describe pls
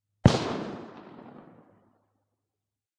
Distent gunshot
A gunshot I recorded in a field from a distance, I left my zoom H4n (built in mics only) rolling while some strangers were doing some target practice near by, the result was a decent sounding distant gunshot, I would like to record some live gunshots from a closer distance at some point, but until that day, this is the best I got :-)
army, attack, bullet, distant-gunshot, field-of-war, fire, firing, fps, gun, gunshot, killing, live-fire, military, murder, pistol, rifle, shoot, shooter, shooting, shot, small-arms, sniper, soldier, war, warfare, war-sound, war-sounds, weapon, weapon-fire, weapons-fire